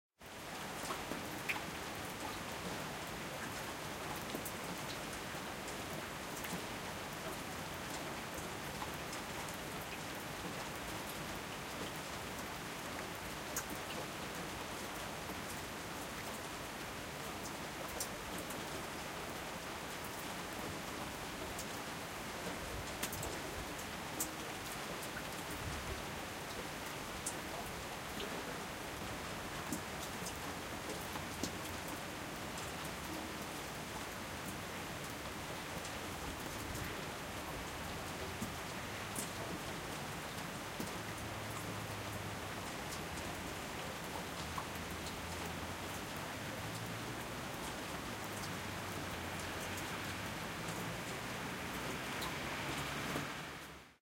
Peaceful rain sound recorded from my balcony.
dripping, rain, Calm, drip